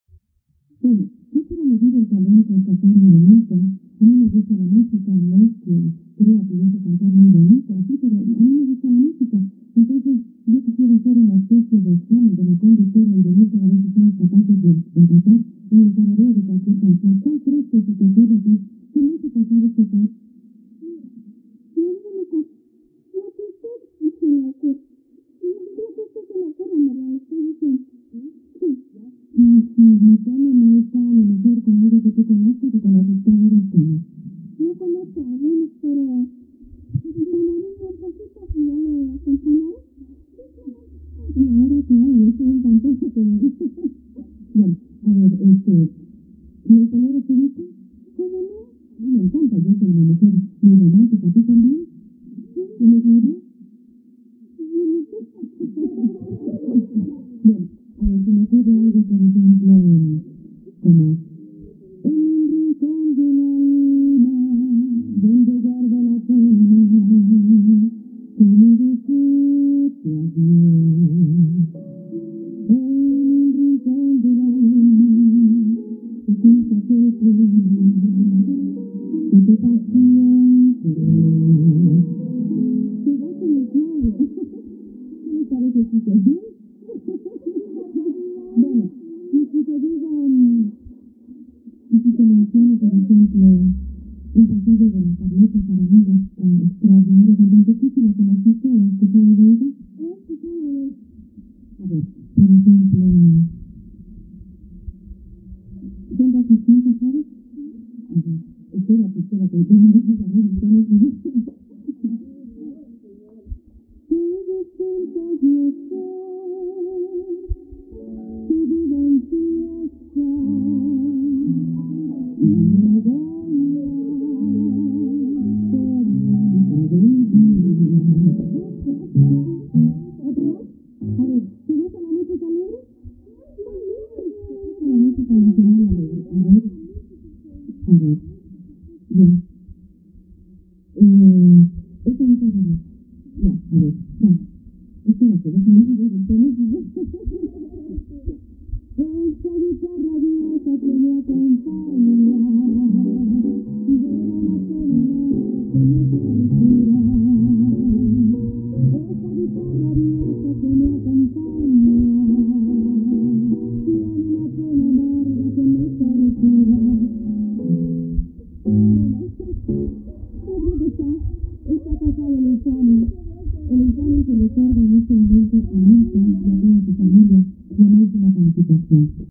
A muffled tv sound effect made for a show. I used Milton's sound Tapes volume 4,Milton memory and then ran it through Audacity.